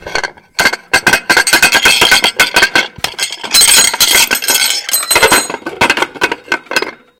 Sounds For Earthquakes - Spoon Cup Plate
I'm shaking a plate, cup and spoon. Recorded with Edirol R-1 & Sennheiser ME66.